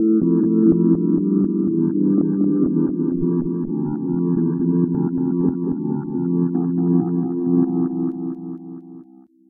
Patch #34 - You can't tell much of a difference, but in this one I reversed the instrument sound. >> Part of a set of New Age synths, all made with AnologX Virtual Piano.
synth, new-age, ambient, sad, loop